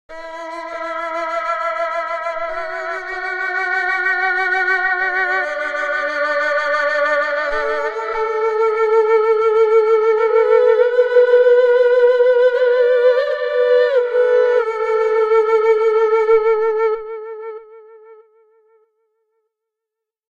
The Erhu (Chinese: 二胡; pinyin: èrhú; [ɑɻ˥˩xu˧˥]) is a two-stringed bowed musical instrument, more specifically a spike fiddle, which may also be called a Southern Fiddle, and sometimes known in the Western world as the Chinese violin or a Chinese two-stringed fiddle. It is used as a solo instrument as well as in small ensembles and large orchestras. It is the most popular of the Huqin family of traditional bowed string instruments used by various ethnic groups of China. A very versatile instrument, the erhu is used in both traditional and contemporary music arrangements, such as in pop, rock, jazz, etc. and makes a calm sound.
DeadEvolution, Jason